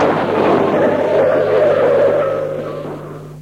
Old breaking done by mixing various sounds I've recorded myself.